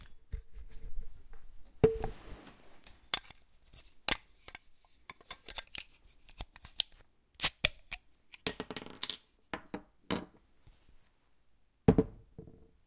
Beer Opening
Opening a beer bottle
beer, beer-opener, bottle, bottle-cap, bottle-opener